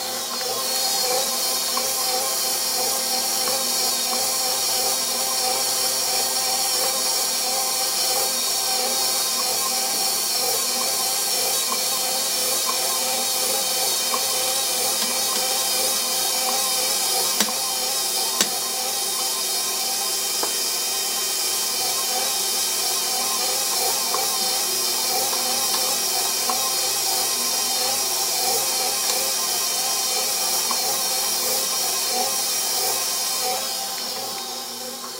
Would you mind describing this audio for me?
KitchenAid Mixer Stirring Bread Dough
A KitchenAid mixer with the dough hook attachment kneading bread dough.
Cooking, Dough, Mixer, Baking, Bread, Baker, Stir, KitchenAid